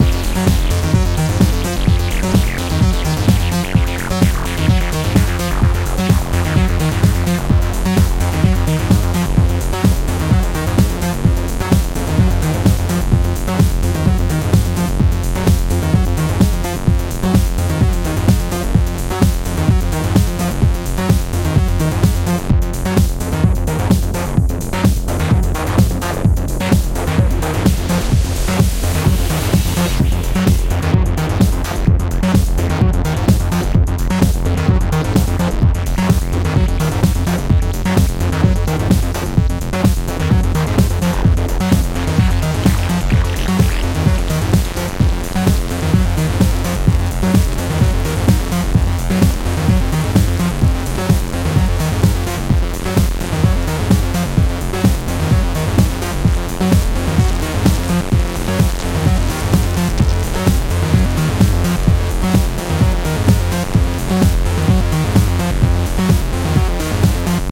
Arturia Acid Chorus Loop
Created With:
Arturia Minibrute
Arturia Drumbrute
Novation Circuit (Drums Only)
February 2019